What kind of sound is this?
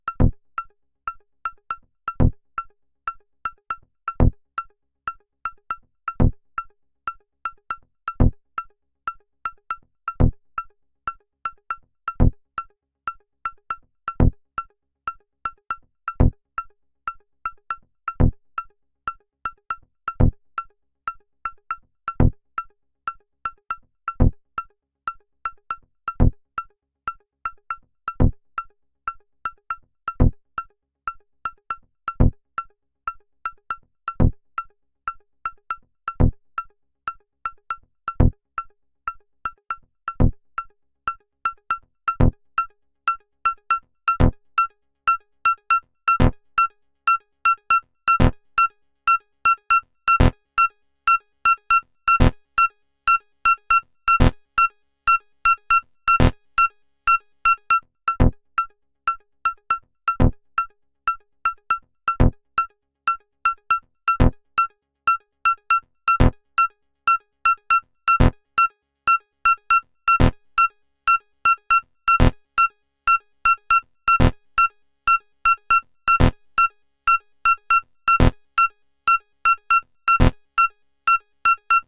Some recordings using my modular synth (with Mungo W0 in the core)
Mungo, Synth, Analog, Modular, W0